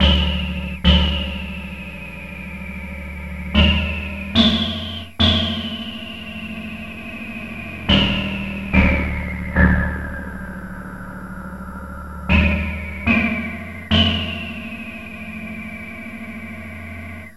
hauptteil fx1
harmonies with fx for sampling.
played, an1-x, freehand, yamaha